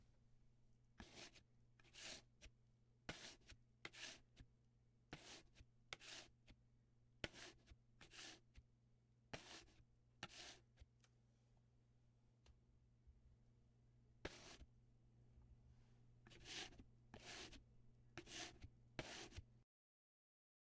cutting up line 2
cutting up a line
up, cutting, line2